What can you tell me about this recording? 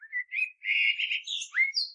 Turdus merula 20
Morning song of a common blackbird, one bird, one recording, with a H4, denoising with Audacity.
bird, blackbird, field-recording, nature